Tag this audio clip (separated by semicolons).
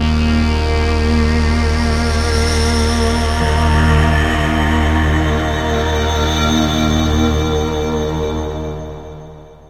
ambience; ambient; atmosphere; cue; digital; electronic; evolving; reverb; sound-design; stereo; synth; synthesizer